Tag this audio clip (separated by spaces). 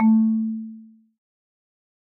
marimba percussion instrument wood